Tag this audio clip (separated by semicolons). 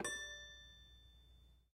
Carnival; packs; toy-piano; Piano; Toy; sounds; Circus